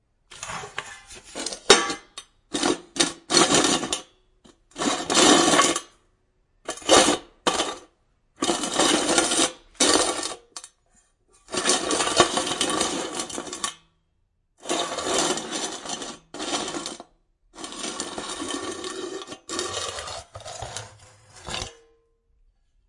metal lid drags on floor close
close, drags, floor, lid, metal